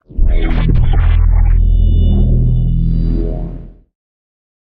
Jingle Lose 00
An electronic and soft static lose jingle sound to be used in sci-fi games. Useful for when a character is dead, an achievement has failed or other not-so-pleasent events.
gaming, high-tech, indiedev, lose, lost, science-fiction